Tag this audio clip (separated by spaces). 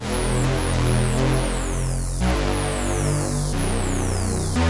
bass dance dub-step electro electronic house saw techno trance wave